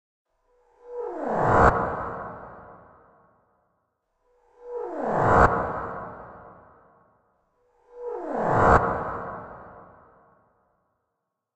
Sonido que asciende para denotar la aparición de algo o alguien